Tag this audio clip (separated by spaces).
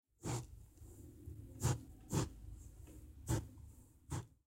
college practice recording